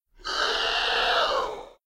A painful lizard scream.
Recorded into Pro Tools with an Audio Technica AT 2035 through the Digidesign 003's preamps. Pitch shifted, EQ'd and layered with itself for character.